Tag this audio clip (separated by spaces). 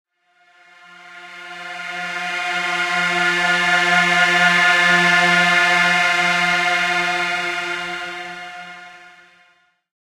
ambient dark dirge pad